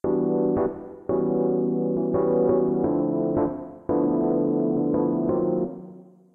Random Rhodes Riff
Just a nice Rhodes riff developed by listening to some Latin-American jazz, with The free MrRay73 VST sequenced in Madtracker2, with some automation on the strength of the keys to simulate actual play.
BPM: 171.5
Chords:
E G B D F#
E G B C# F#
D F A C E
D F A B E
jazzy, phrase, riff